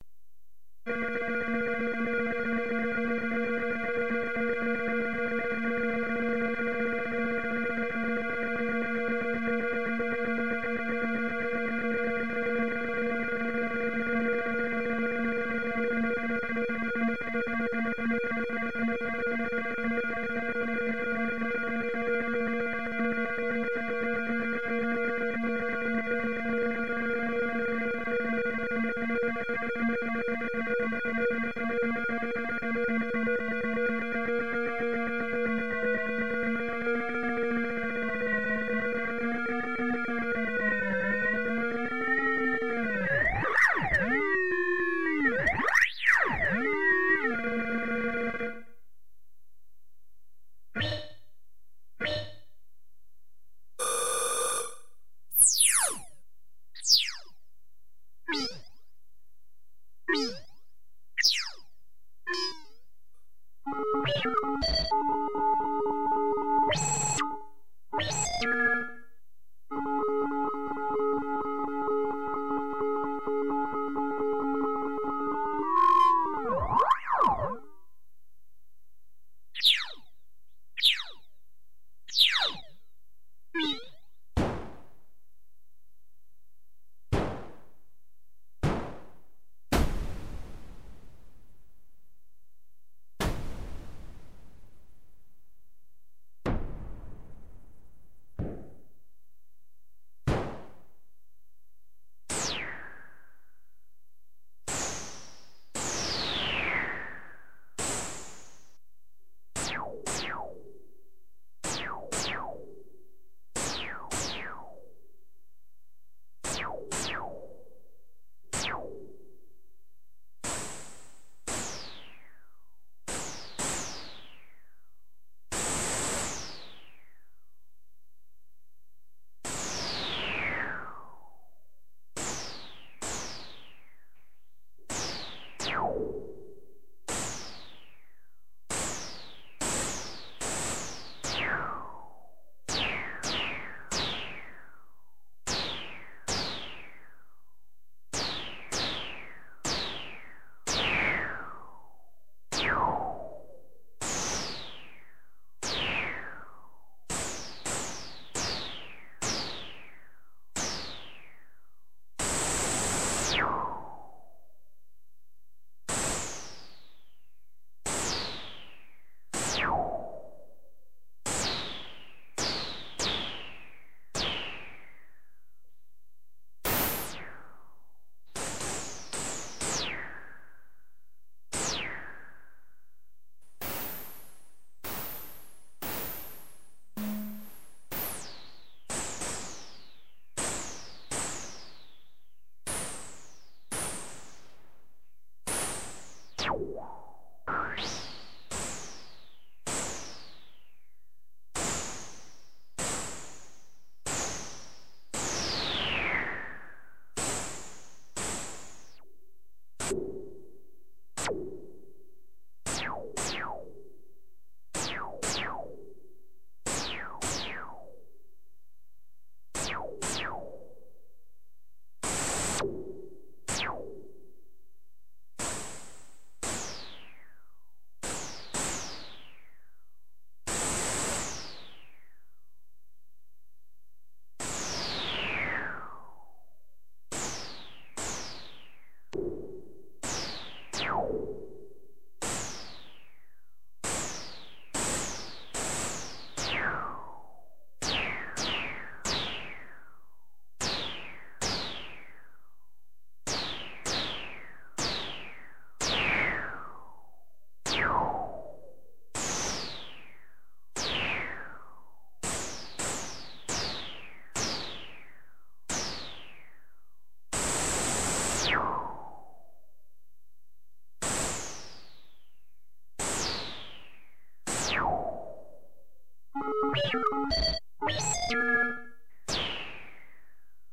miscellaneous scifi effects I di with clavia nordlead2
synthesis, synthetic, unsorted, scifi, miscellaneous